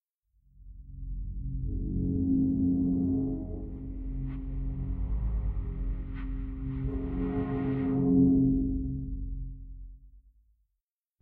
Dub Techno Loop
Loopable mutated electronic chord for dub techno. Deep electronica.
Please check up my commercial portfolio.
Your visits and listens will cheer me up!
Thank you.
ambient, dub, dub-techno, loop, melodic, sfx, sound-effect, techno